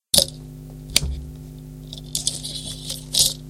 Clip reel on
Audio of clipping on reel to projector arm.